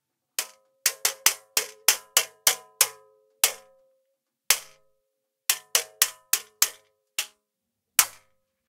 METAL SFX & FOLEY, light metal tap with reverb (empty canister hit with a coin)
Some metal based sounds that we have recorded in the Digital Mixes studio in North Thailand that we are preparing for our sound database but thought we would share them with everyone. Hope you like them and find them useful.
canister, Mixes, Alex, Digital, Ed, metal, tap, Sheffield, Boyesen